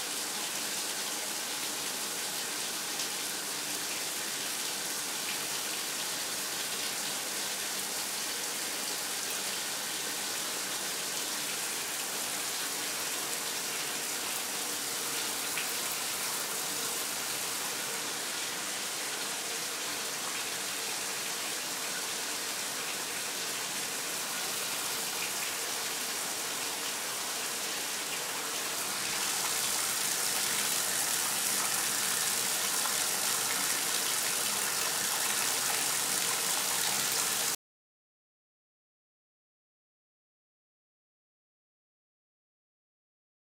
Shower water bath
in a bathroom with the shower on